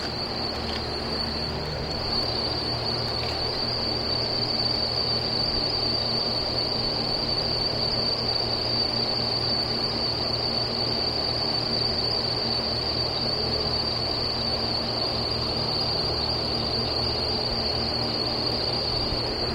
I recorded this sound back in 2002. A vent on a roof that was whistling.